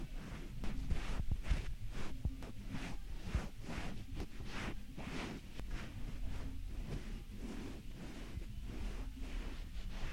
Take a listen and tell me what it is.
Rozamiento ropa
rozamiento de la ropa
rozamiento, ropa, clothes